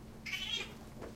oscar - attacked 1
oscar the cat, upset at being insulted
mad, cat, scrowl